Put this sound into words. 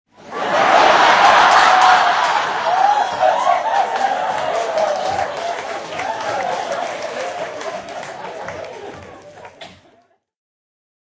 LaughLaugh in medium theatreRecorded with MD and Sony mic, above the people